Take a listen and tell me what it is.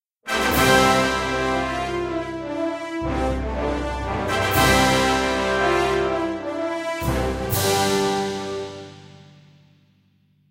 Orchestral section ideal for moments of victory or an entrance to the royal palace.
Made in a DAW with Cinematic Studio Brass and some instruments of EWQL Symphonic Orchestra